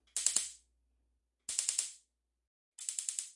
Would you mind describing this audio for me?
Oven Spark
electric, electricity, kitchen, oven, spark